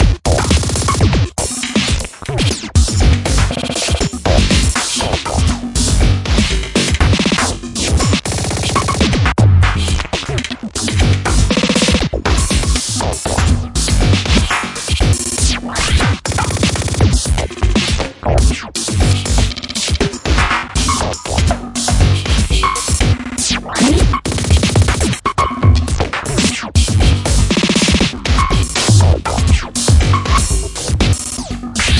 Crazy rhythm loop 120 BPM 0012
First rhythmic layer made in Ableton Live.Second rhythmic layer made in Reactor 6,and then processed with glitch effect plugin .
Mixed in Cakewalk by BandLab.
sound, chaotic, futuristic, abstract, scratch, rhythm, drum, broken, glitch, crazy, rhythmic, loop